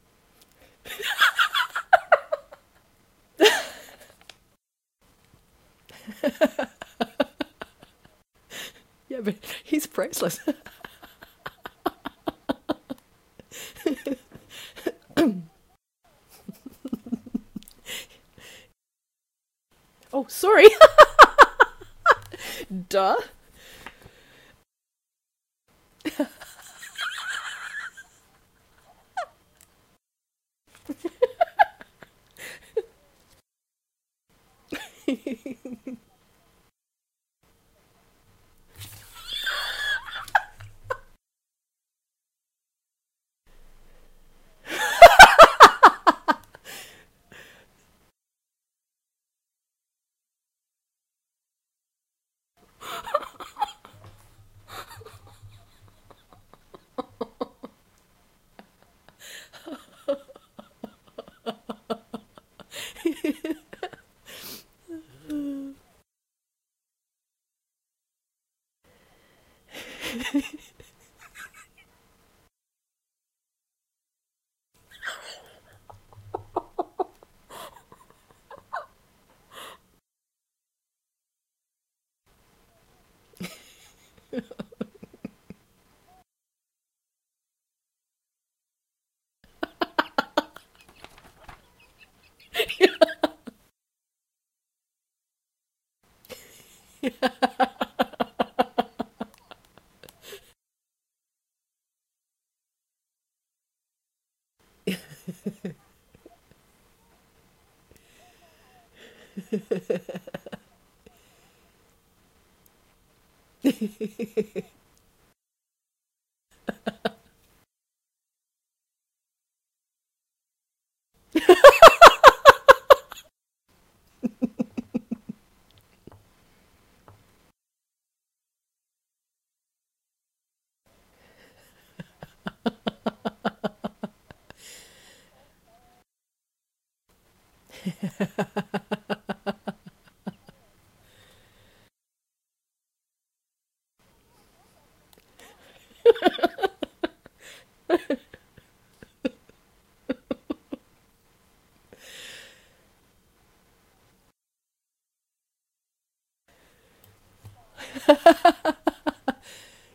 An alternative to the female laughter I've already posted, a different woman chuckling, giggling, and guffawing.
chuckle, female, foley, giggle, guffaw, laugh, laughing, laughter, woman